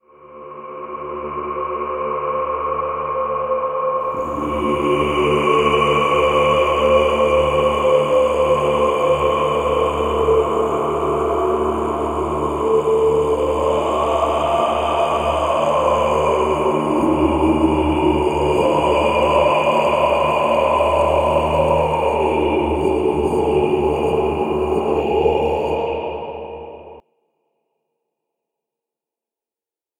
mongo chant
D Mongolian drone chant....
used reaper to beef up with compression, reverse verb & phase for quad matrix encode...
recorded with unmatched large diaphragm cardioid condenser and medium sub cardioid diphram condenser in 90 degree xy....
chant, chanting, D, drone, Mongolian, quad, undertone